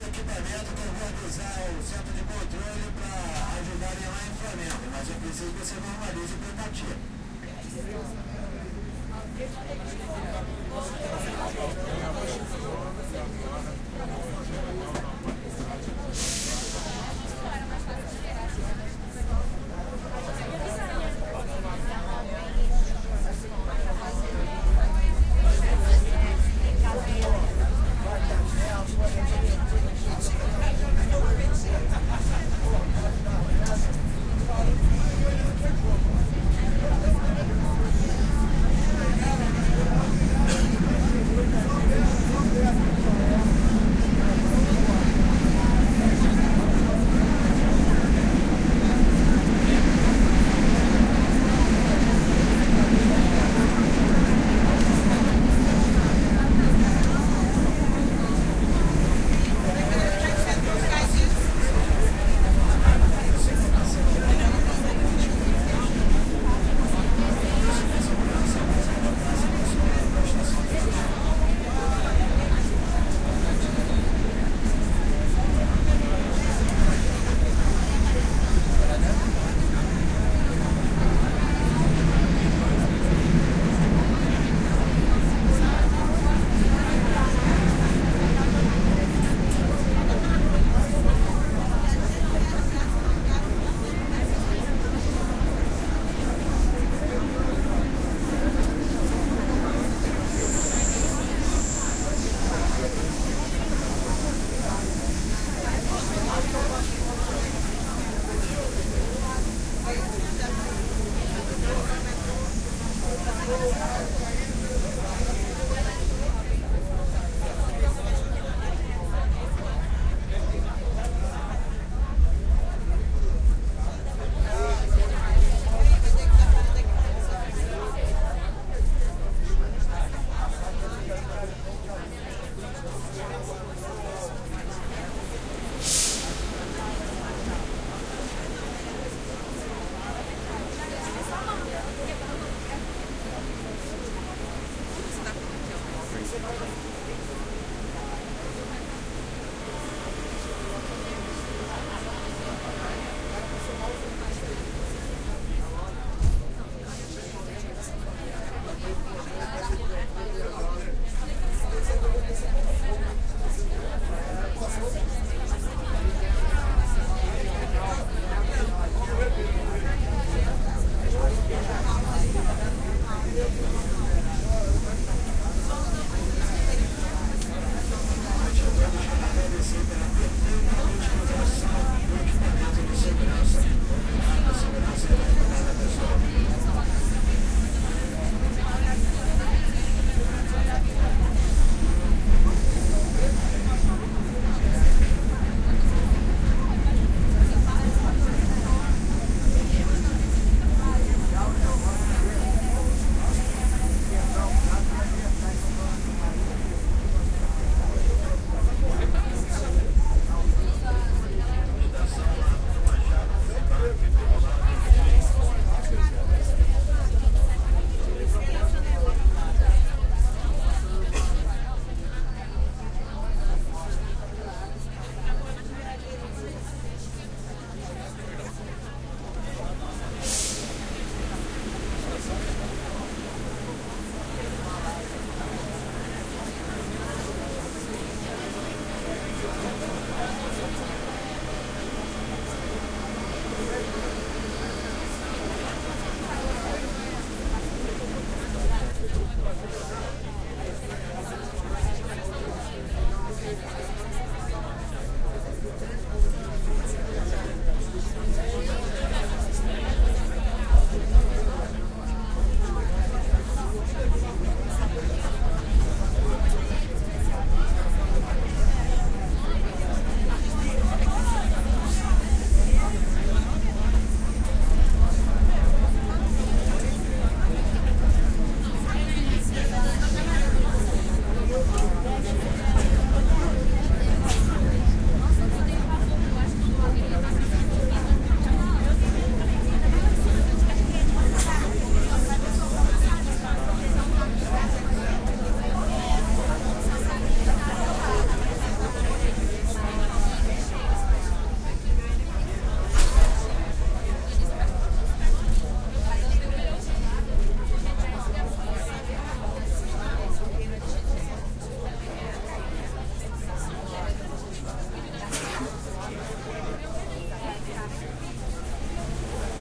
RDJ-Metro01
Interior of metro train in Rio de Janeiro, Brazil. Few stops, announcement, big crowd of people. Recorded with DIY binaural glasses and Nagra Ares-P.